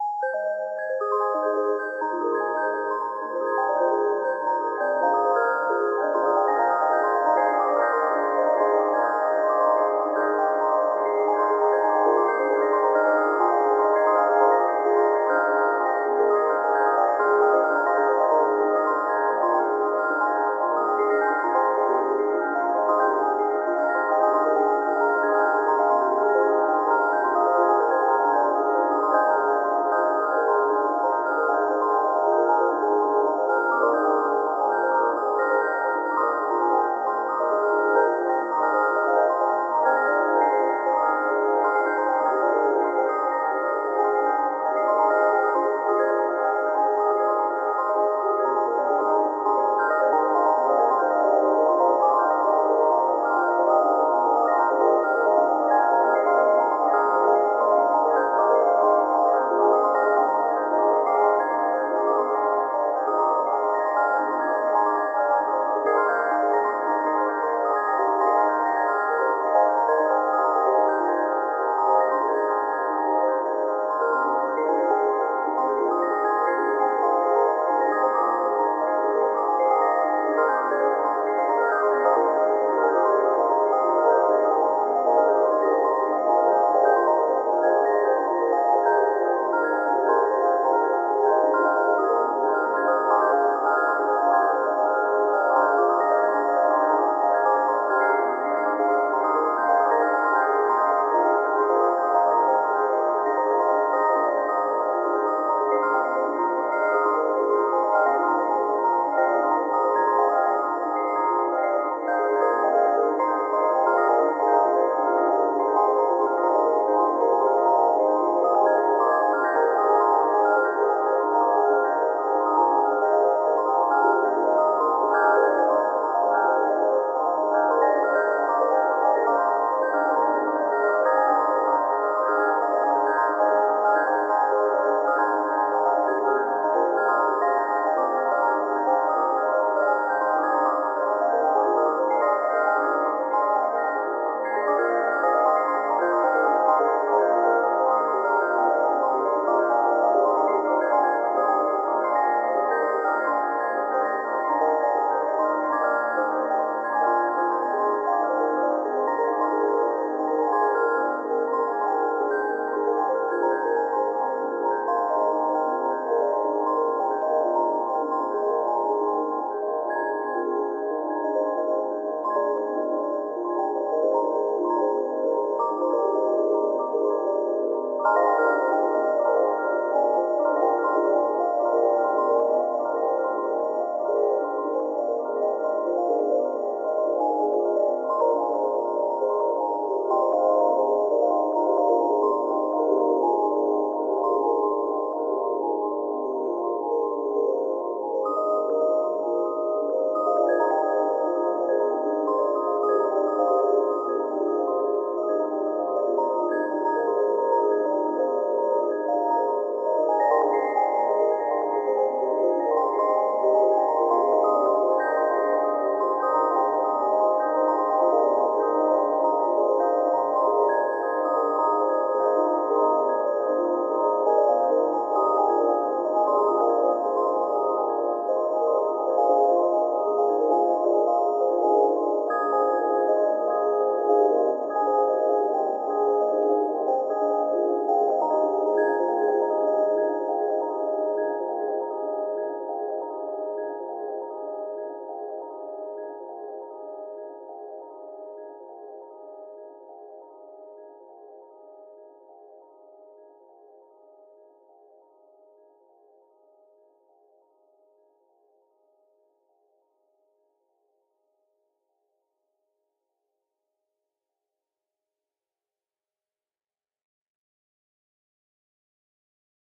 Generative Ambient Sine Bells [100bpm] [D Sharp Major]
free, meditative